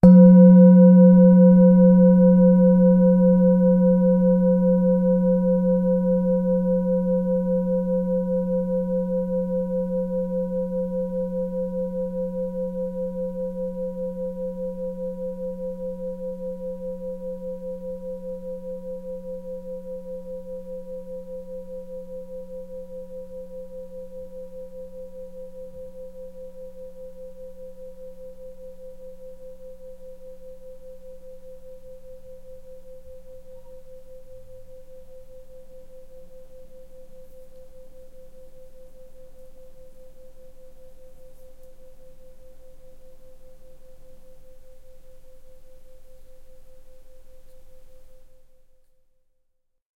singing bowl - single strike 1
singing bowl
single strike with an soft mallet
Main Frequency's:
182Hz (F#3)
519Hz (C5)
967Hz (B5)
Zoom-H4n, soft-mallet, record